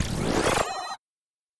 Pick-up Health
From a collection of sounds created for a demo video game assignment.
Created with Ableton Live 9
Absynth
Recording:Zoom H4N Digital Recorder
Bogotá - Colombi